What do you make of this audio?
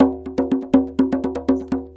tambour djembe in french, recording for training rhythmic sample base music.
djembe, drum, loop